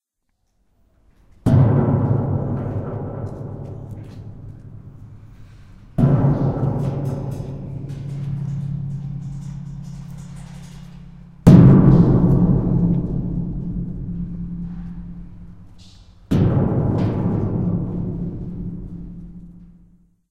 Metal Sheet Bang
Banging on a large metal sheet. Metal is most likely steel or a composite sheet metal. Fairly thin and flexible measured approximately 3 ft by 5 ft.
metal-work, sheet, reverberate, bang, metal, scraps, aip09